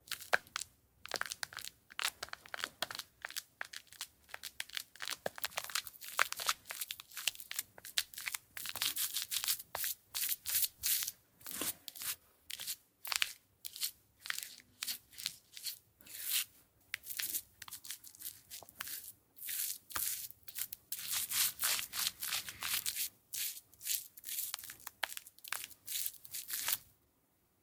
applying shaving cream before shaving (facial hair).
Rode NTG-2-> ULN-2.
bathroom, cream, desensitize, face, facial-hair, foam, Foley, gel, hair, lubricate, shave, shaving, shaving-cream, shaving-foam